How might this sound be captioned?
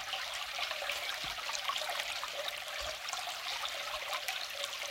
Stream in a forest 2
Small stream recorded near a lake in my local forest.
Hope you find it useful. If you like the sounds check my music on streaming services too (search for Tomasz Kucza).
ambient, brook, creek, flow, gurgle, liquid, relaxing, river, stream, water